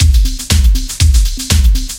Another of my beats. Made in FL studio, using mostly Breakbeat Paradise. But the kick can be found here: